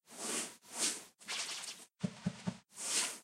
rope pulling with funny knot and all that jazz
pull, rope, stretch